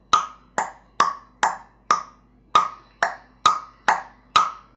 Klackern - Mouth 03
Klackern out of the mouth. Clean.
Clean, Klack, Microphone, NoiseReduction, Record, Sample, Voice